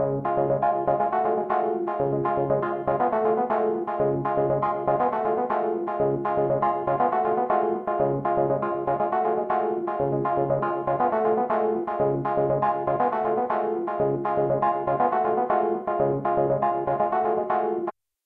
the bleeps
Loop phrase made with Korg Triton patch.